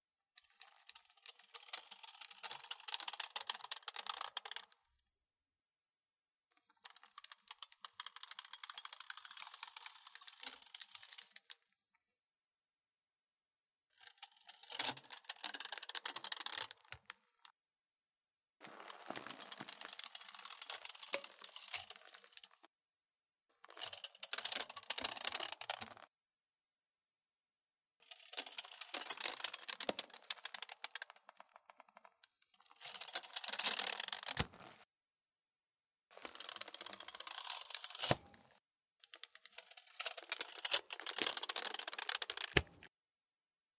broken umbrella squeaks
It might be useful for tiny robots or other little technical creatures :)